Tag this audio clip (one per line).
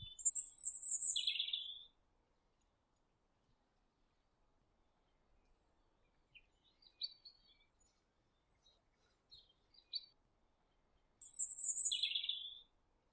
birds
outdoor
nature